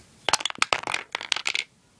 wood impact 08
A series of sounds made by dropping small pieces of wood.
crash, drop, block, hit, impact, wooden, wood